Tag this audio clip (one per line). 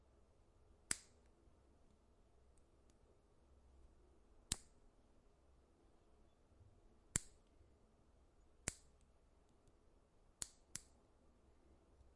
nail
nipper
bathroom